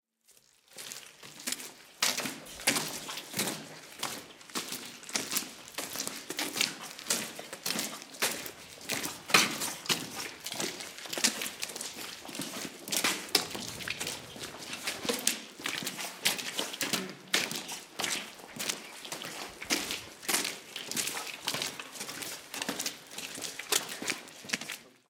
Pas dans eau+boue 2 pers
One person walking into the catacombs with some water recorded on DAT (Tascam DAP-1) with a Sennheiser ME66 by G de Courtivron.
water, walking, person